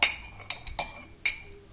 spoon cup 3
Dropping a spoon in a cup.